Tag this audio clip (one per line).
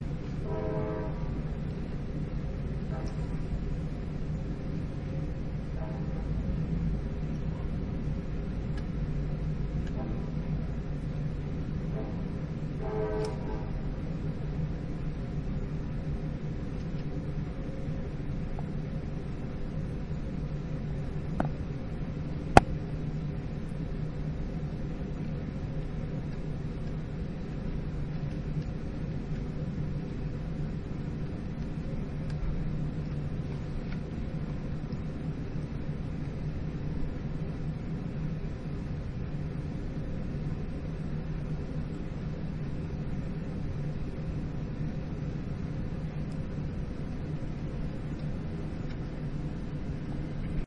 road-noise
house
AC
Amtrak